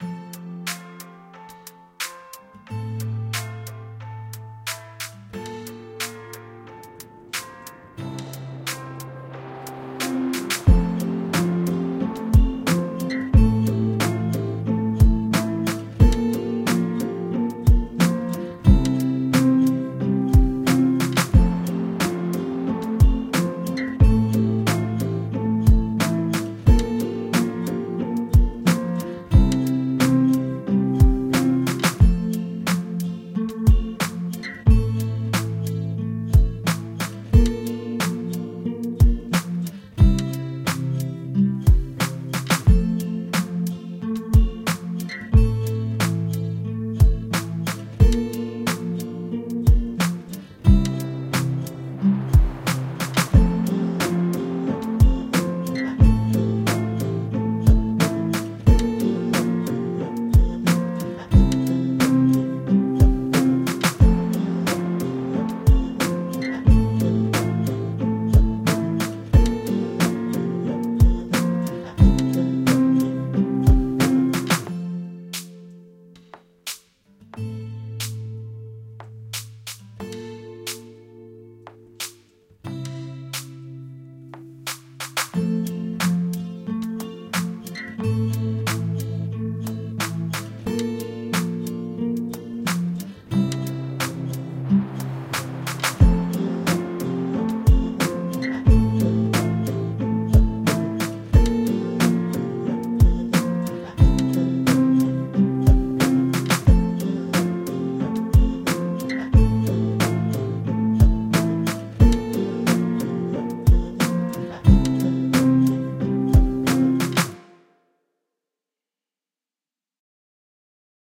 Happy Commercial Music
beat, feelgood, cheerful, rhythmic, music, acoustic-guitar, bright, happy, groovy, garbage, loop, guitar, playful, loops, drum-loop, beats